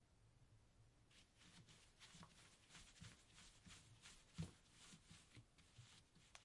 rennt in Galerie
Steps on a forrest ground
feet, foot, forrest, wood